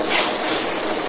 6-20-2006 after eight
faint whispering captured on a digital Sony IC Recorder in my empty bedroom. recordings follow a series of bizarre nights which my girlfriend and i experienced in our home.